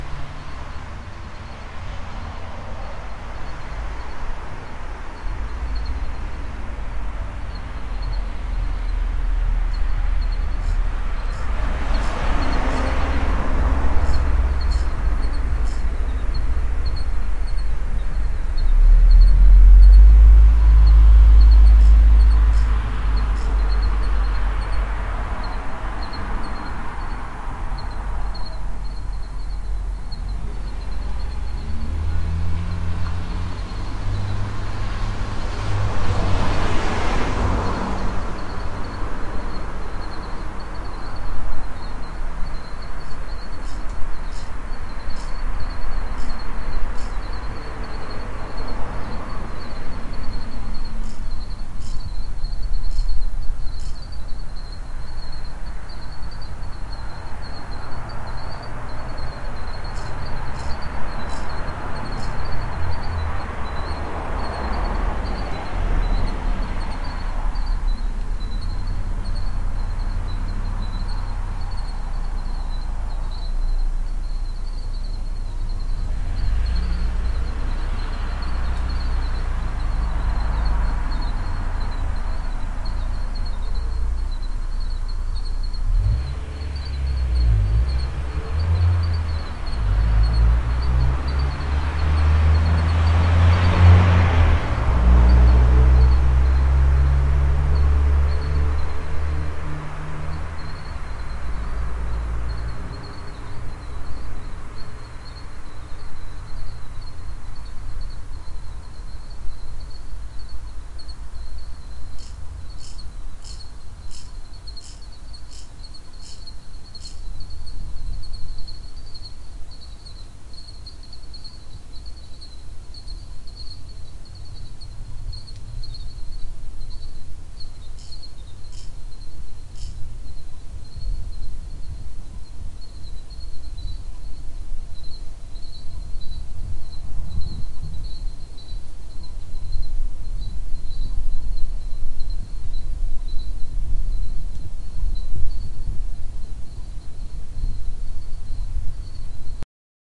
Backyard Crickets and traffic
Ambience in my backyard at about 1am - crickets and traffic noise.
Mono
mic-parts S-87, and Audient preamp.
Use it for anything, just send me a message if you do, I'd love to see your work!!!
aotearoa, auckland, crickets, field-recording, new-zealand, night, night-time, suburban, traffic, urban